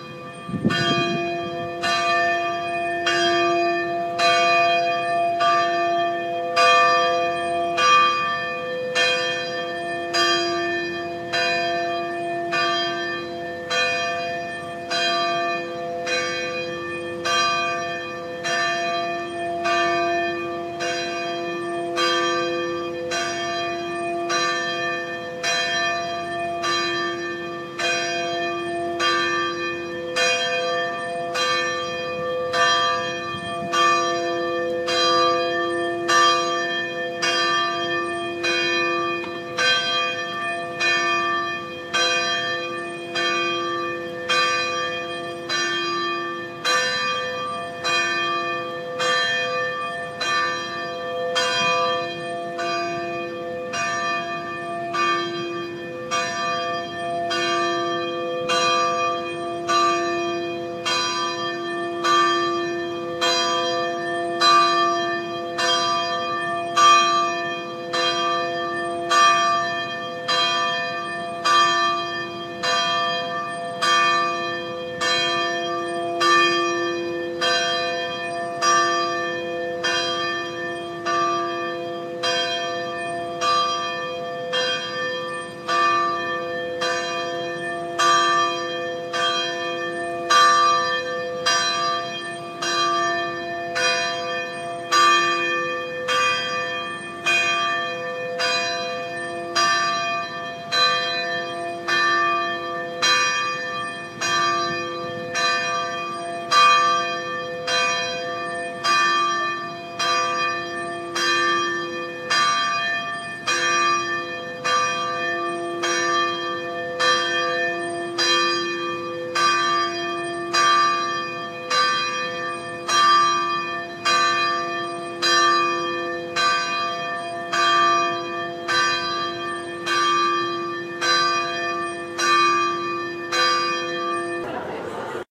Mt Marry Bells
The recording was taken on the centennial anniversary of Mount Mary University in Milwaukee, Wisconsin using an iPhone. The bell, in Notre Dame Hall, rang 100 times, once for every year (Duh, lol)
bells, bell-tower, field-recording, sfx, sound-effects